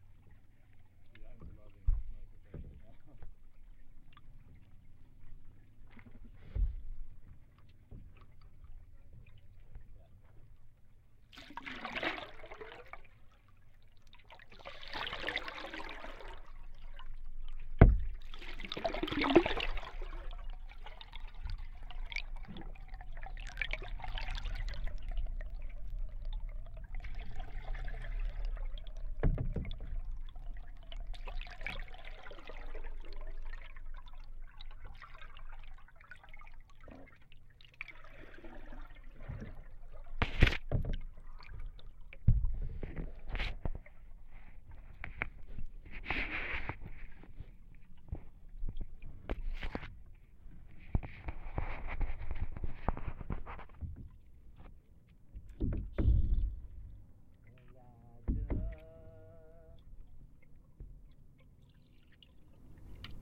paddling in lake lbj 08232013 3
Noises recorded while paddling in lake LBJ with an underwater contact mic
underwater, contact-mic, gurgling, aquatic, rowing, dripping